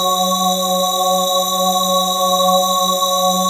Bight, Breathy Digital Organ made with Reason Subtractor Synths and Logic Drawbar Organ. 29 samples, in minor 3rds, looped in Redmatica Keymap's Penrose loop algorithm.
Breathy, Bright, Digital, Multisample, Organ